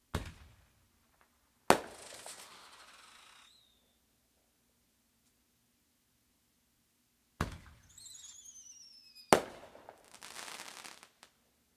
What I thought was the last of the fireworks recorded with laptop and USB microphone... I was wrong.
firecracker, 4th, field-recording, independence, holiday, july